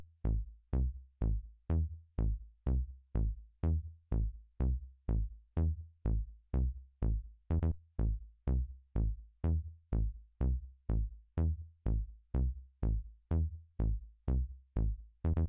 BASS II
Bass, House, music, Electronic Bass